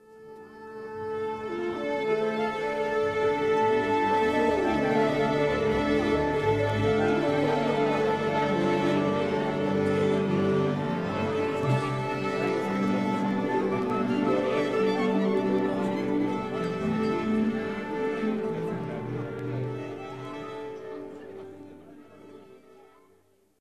Orchester stimmen
orchestra tuning -
Recording: Tascam HD-P2 and BEYERDYNAMIC MCE82;
tuning, orchestra